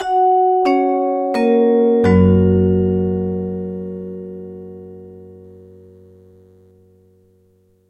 Decreasing intro for an announcement. Recorded with Yamaha PF-1000 and Zoom H5, edited with Audacity.
airport, announcement, automated, beginning, gong, intro, platform, railway, station, tannoy, train